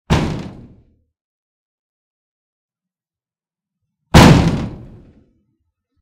Hiting a window without breaking the glass